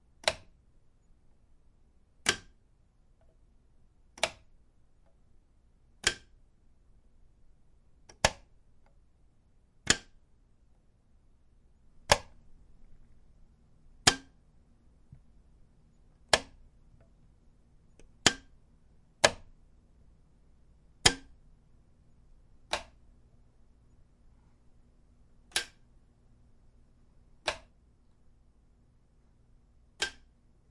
light switch wall on off various
off, switch, light